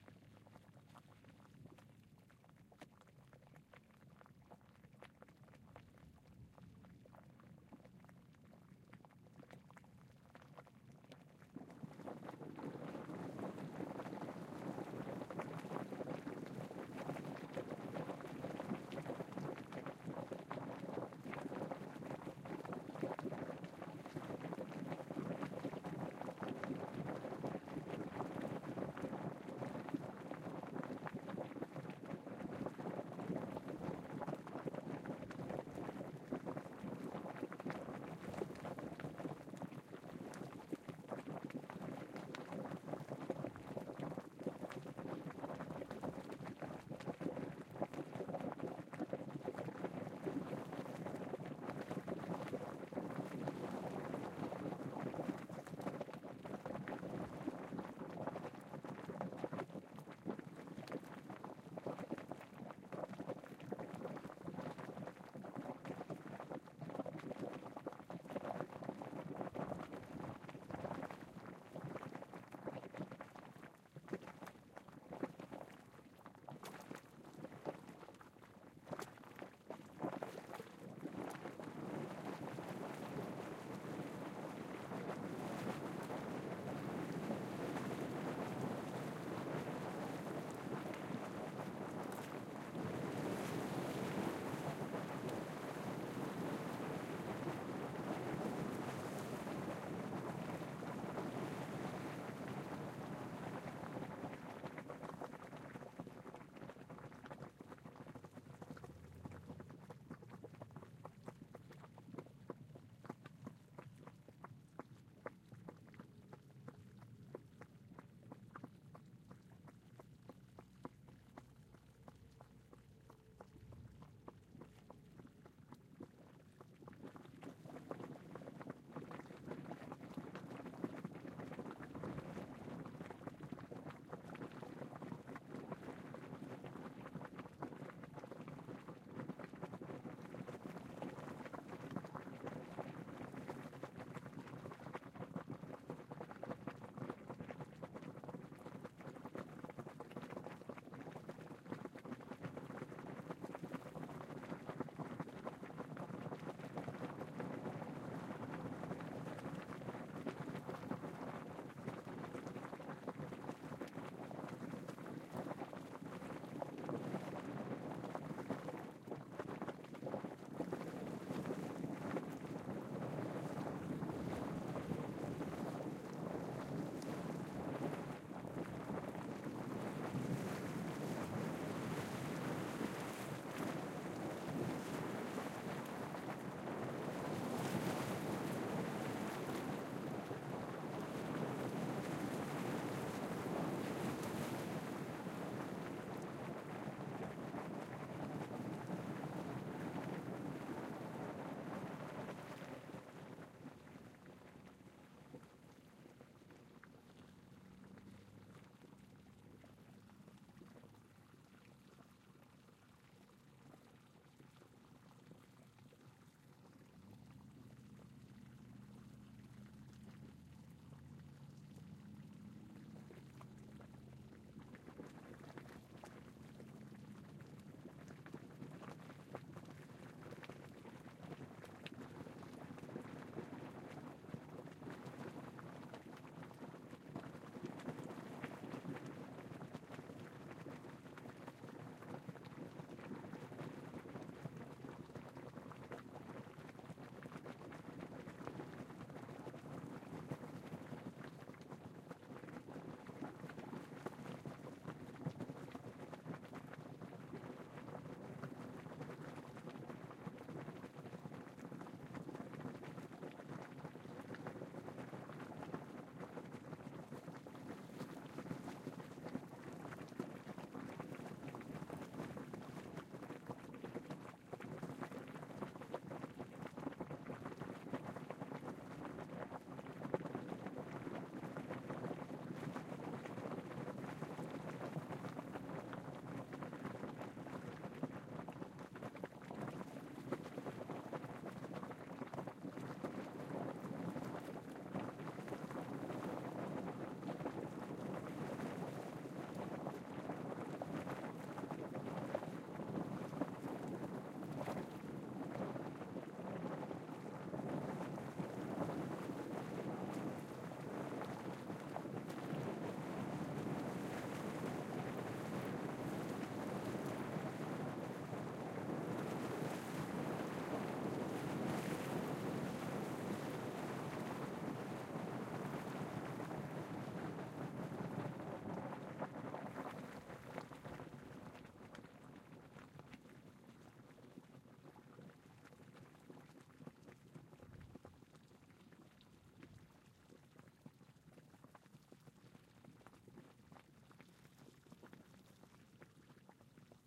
Bubbling Hot Spring
A hot spring recorded with two AKG-P220 microphones.